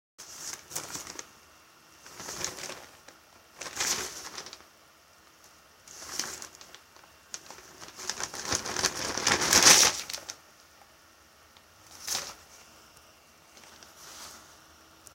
Periódico siendo manipulado